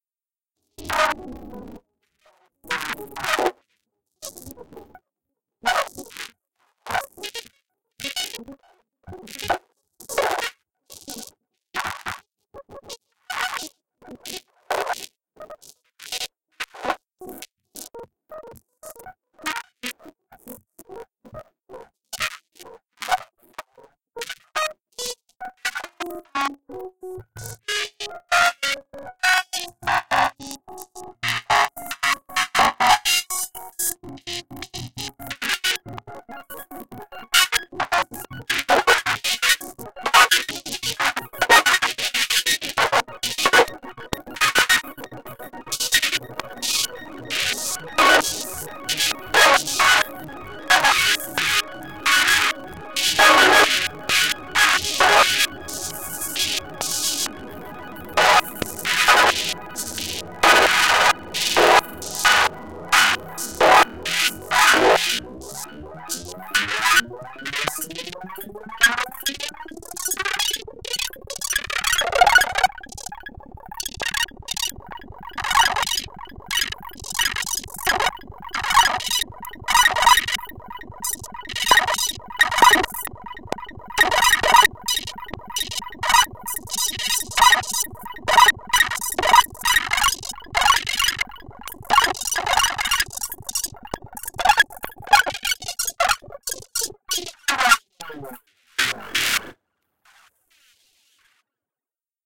Glitch sound FX that intensify as time progresses.